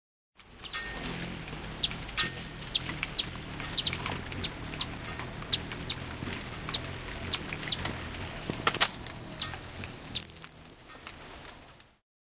Hummingbirds buzzing near a feeder.